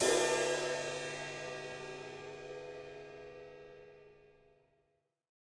cymb crashlite3
a percussion sample from a recording session using Will Vinton's studio drum set.
hi, cymbal, crash, percussion